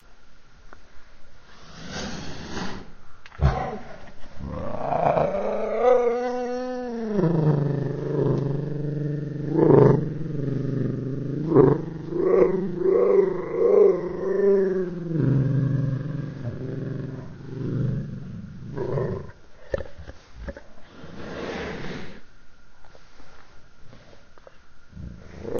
A cat growling and slowed down.